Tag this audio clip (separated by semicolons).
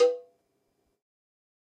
pack; drum; cowbell; kit; god; more; real